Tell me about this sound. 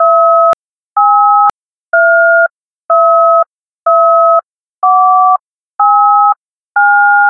DORLEANS danielle 2019 2020 phonekey
I created a DTMF tone with an amplitude of 0.8 and a tone/silence ratio of 550 and during 7s. Then I amplified 1.3 db sound. And finally I multiplied the speed of 0,960
key, number, phone